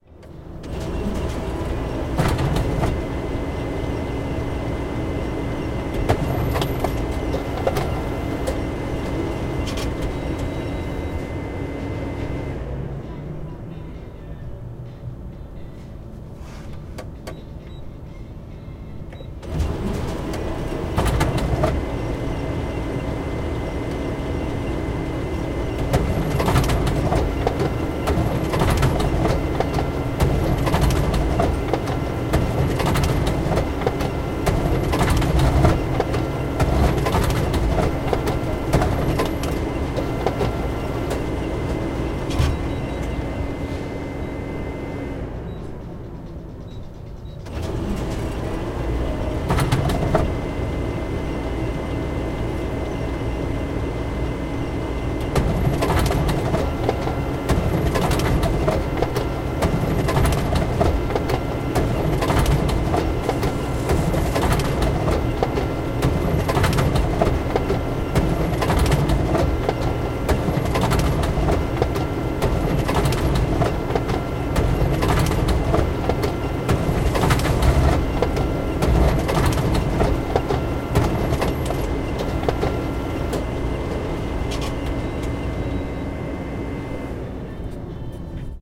Copy Machine 1
A recording of a Canon 5070 copy machine in operating and being programmed. During the recording, heavyweight cardstock (A7) was being printing on, being fed from the machine's side bypass.